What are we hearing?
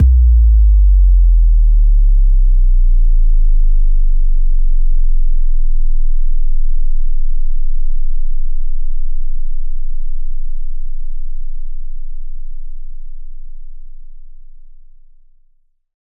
Sub impact sound fx
Sub, low, massive